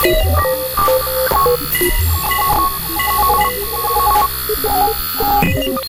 firstly i've created a few selfmade patcheswith a couple of free virtual analog vsti (synth1 and crystal, mostly)to produce some classic analog computing sounds then i processed all with some cool digital fx (like cyclotron, heizenbox, transverb, etc.)the result is a sort of "clash" between analog and digital computing sounds